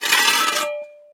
Dragging Garden Spade Against Concrete 1
Recording of me dragging a garden spade against a concrete floor.
Mid and high frequency scrape of metal spade against concrete.
Recorded with a Zoom H4N Pro field recorder.
Corrective Eq performed.
This could be used for the action the sound suggests, or for an axe being dragged ominously against a stone floor.
This was originally used in a project. It was used for a character dragging an axe over a barn floor.
See project at